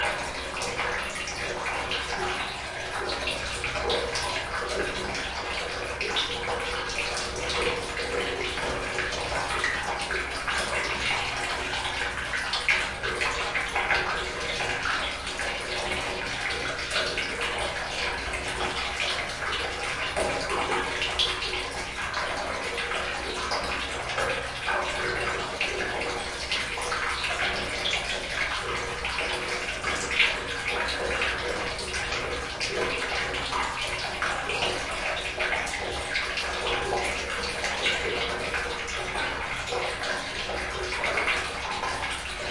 Water flowing to the metal container XY
Water flowing to the metal container in small room.
Roland R-26 internal XY microphones.
field-recording, flowing, metal, water, R26, roland-R-26, flow, container